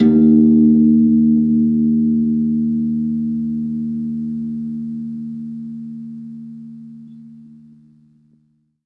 String 4 of an old beat up found in my closet.